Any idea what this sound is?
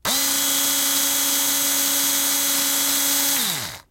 A small power screwdriver being operated right next to the microphone. You'll want to narrow the stereo field to use this for things that aren't at point-blank range (like someone using a drill a few feet from the camera).
drill, drilling, power, screwdriver, whirr